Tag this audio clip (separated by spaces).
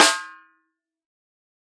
multisample snare drum 1-shot velocity